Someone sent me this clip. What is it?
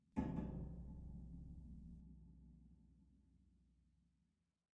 as ab os metal hits muted 2
Various deep metalic hits and sqeeks grabbed with contact mic, processed
sci-fi, atmosphere, dar